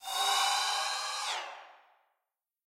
cymb shwish 12
cymbal hit processed with doppler plugin
processed, doppler, hit, cymbal, plugin